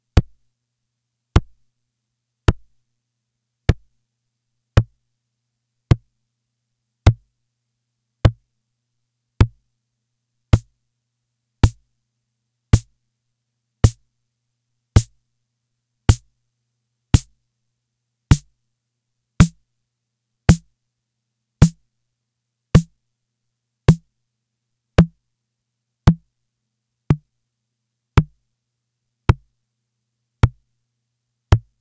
1983 Atlantex MPC analog Drum Machine snare drum sounds
drum, analog, snare, 1983, mpc